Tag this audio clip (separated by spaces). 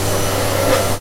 factory field-recording machines